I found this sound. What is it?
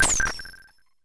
Short modulated oscillations, yet another variation. A computer processing unknown operations.Created with a simple Nord Modular patch.
nord, modulation, synth, effect, blip, bleep, digital, synthesis, robot, modular, beep, sound-design, fm